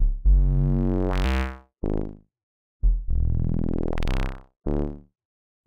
an output from a home made pure data bass generator patch
bass, loop